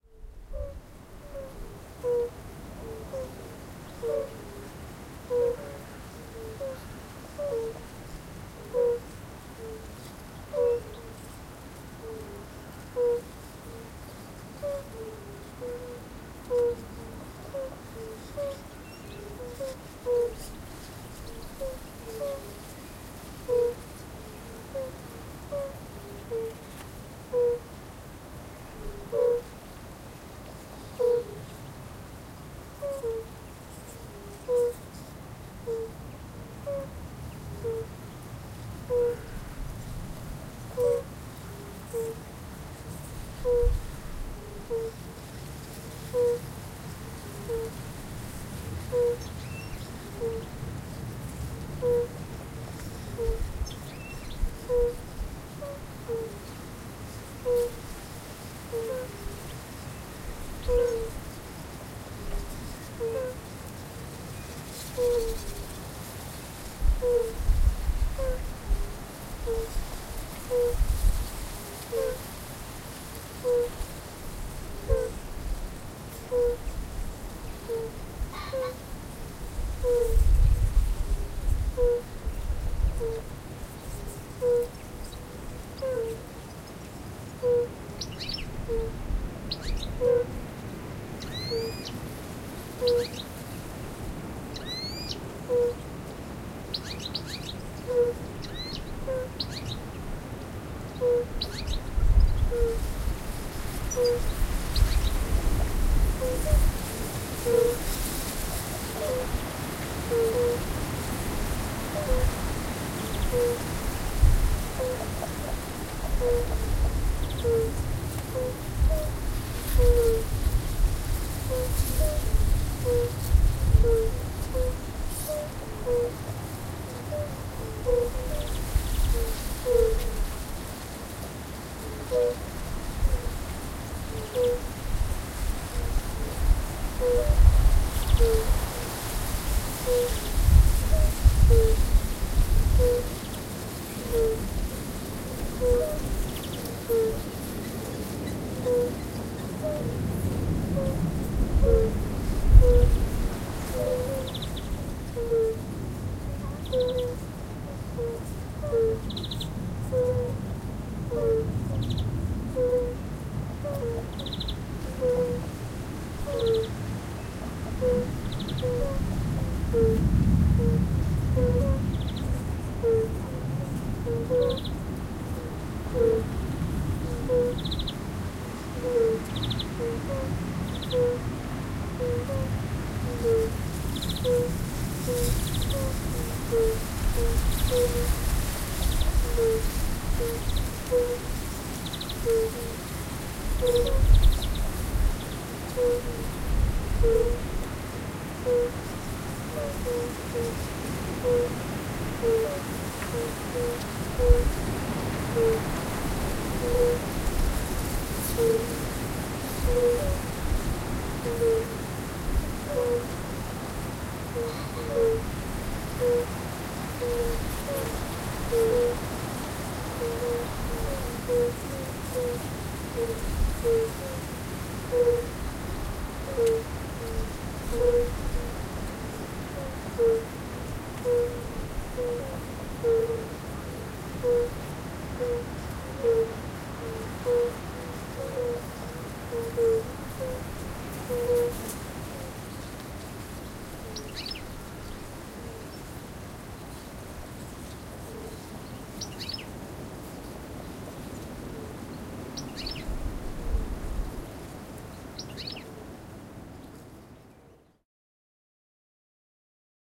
Fire-bellied toads in a windy spring day
A windy day in April. Fire-bellied toads (Bombina bombina) and common spadefoot toads (Pelobates fuscus) mate calling sounds around.
fire-bellied, frog, toad, windy